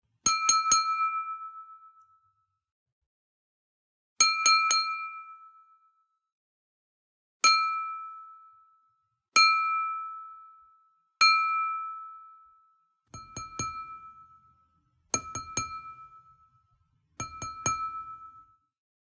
Wine Glass Toast Clink
Tapping on a wine glass as one would to bring attention to a toast at a party.